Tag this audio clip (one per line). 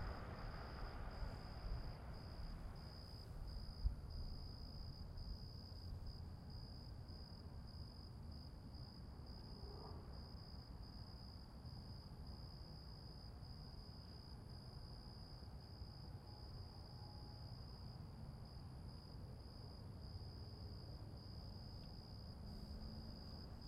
ambience
country
crickets
field-recording
nature
night